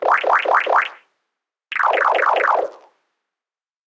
arcade drops
just some funny noises made with water drops
used software: FL Studio 10
8bit, arcade, chip, drops, games, pacman, retro, video-games, water, weird